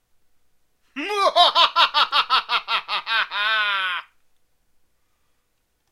evil laugh-05
After making them ash up with Analogchill's Scream file i got bored and made this small pack of evil laughs.
evil, laugh, male